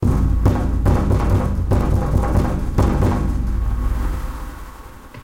Kitchen Battle Drum Fantasy Mastered 200616 0007 01
Kitchen Battle Drum Fantasy Mastered
Recorded Tascam DR-05X
Edited: Adobe + FXs + Mastered
Fantasy, Room, Room-Recording, Mastered, Drum, Battle, Drums, Kitchen